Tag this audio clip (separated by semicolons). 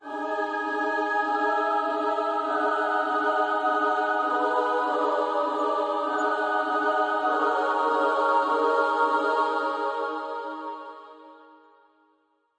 background-sound; pad; film; mood; spooky; epic; ambient; dramatic; background; thriller; horror; hollywood; thrill; choir; soundscape; dark; chor; scary; suspense; drama